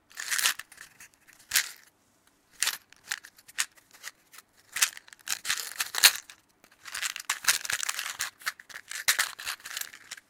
Matchbox Handling
Moving a small box of matches in my hands, grabbing and shaking it at various speeds. Around 20 matches are inside the box.
Recorded with Sennheiser ME 64 on Focusrite Scarlett.
If you use my sound I would love to see, how. If you like, share your project.
Box, Burning, Fire, Flame, Ignite, ignition, Light, Lighting, Match, Matchbox, Match-Box, Matches, Matchsticks, Moving, Phosphorus, Rattling, Starting